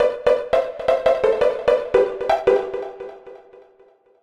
Riff 4 170BPM
pizzicato riff loop for use in hardcore dance music
synth, hardcore, pizz, riff, loop, pizzicato, 170bpm